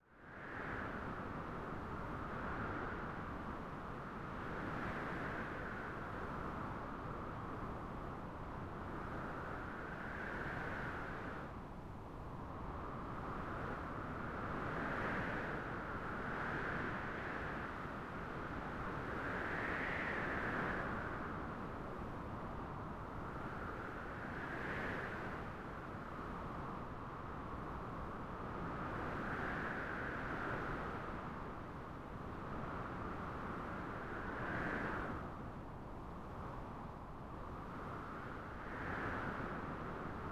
The sound of draft and wind. Created on a VST synthesizer using three white noise generators and three filters. The sample already has a loop set up for flawless use in samplers. Enjoy it! Please, share links to your work where this sound was used.
Note: audio quality is always better when downloaded.